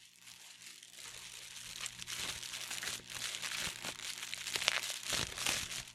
plastic ruffling6
making noise with plastic. fun.